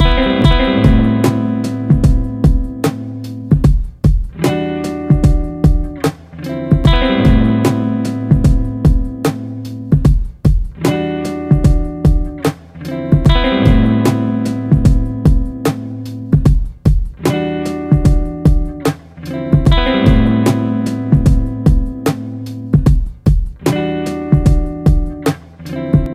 hip hop
hip-hop, old, school